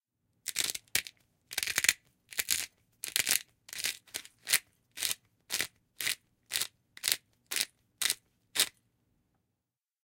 This is the sound of a 12" pepper grinder in use.
Recorded with a Schoeps MS mic setup onto a Sound Devices MixPre-6
dinner, grinder, canteen, pepper, salt, cafe, diner, food, plates, restaurant, eat, dish, eating, breakfast, plate, lunch, dining